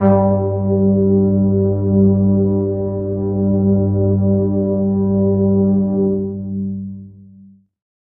a synthetic brass pad
space, brass, synthetic, pad